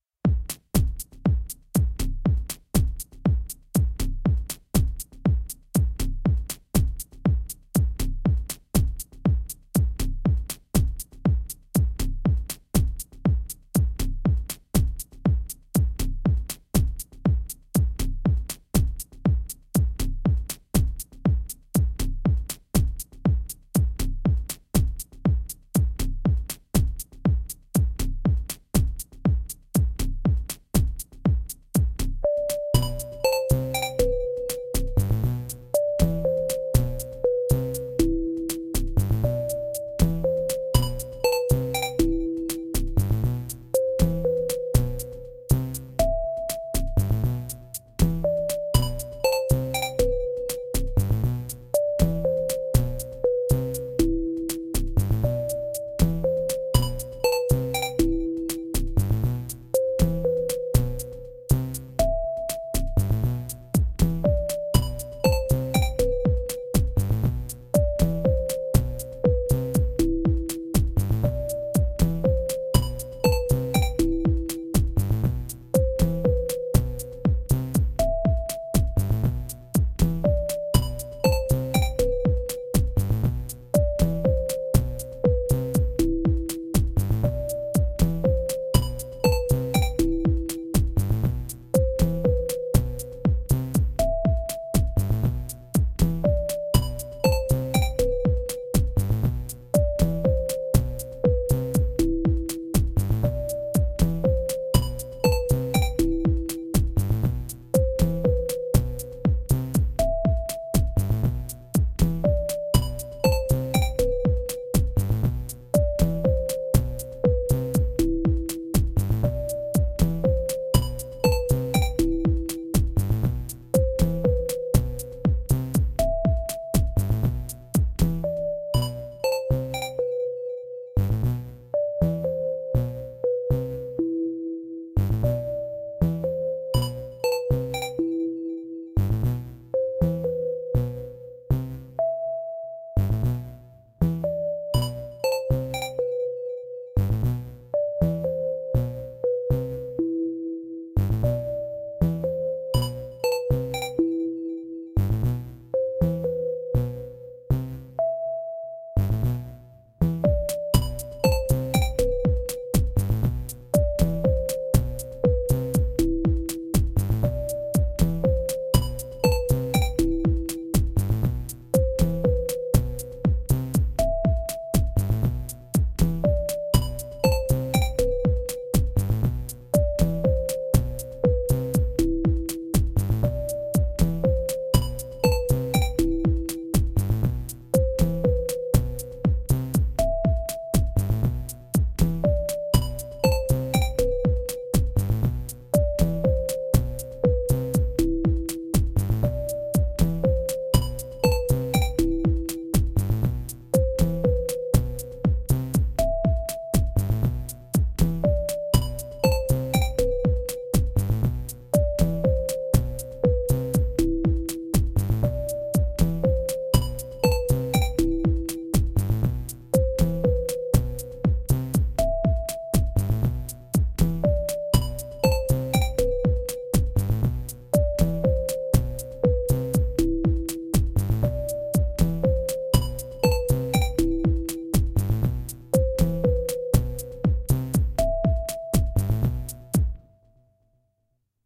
It was unbelievably: little needle star dance burned my heart. Op-z mix
alien, astro, cosmos, dance, dream, edm, effect, electro, electronic, fun, future, groovy, idm, laser, music, sci-fi, soundesign, soundtrack, space, spaceship, synth